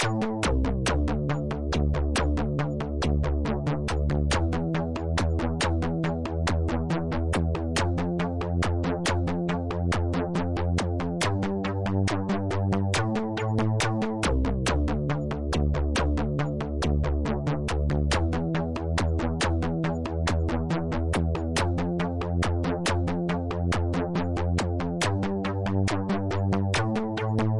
rhythmic bass loop2
Synth base loop I created through my music production software.